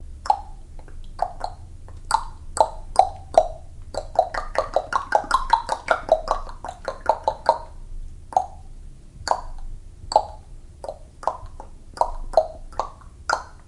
click tongue
my roommate clicking his tongue.
click, mouth, tongue